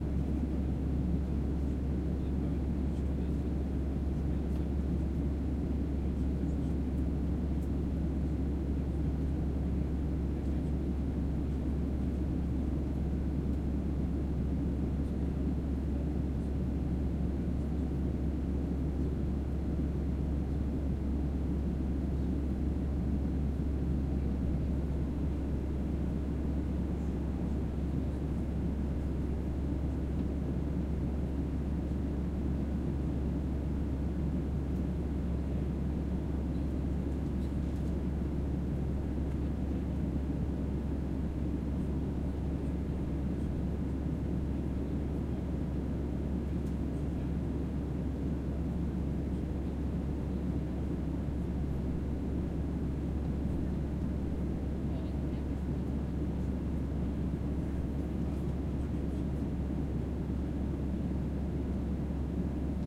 This airplane ambience was recorded with the Zoom H6 and its XY stereo capsule on the flight from Stavanger to Oslo, Norway.
air, airplane, airplane-ambience, ambience, destination, fly, Martin, norway, Norwegian, oslo, plane, stavanger, XY, Zoom, Zoom-H6